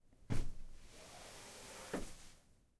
fall and floor
You can hear a person who leans on the wall and drops to the ground slowly. It has been recorded in Pompeu Fabra University, Barcelona.
campus-upf, falling, floor, UPF-CS14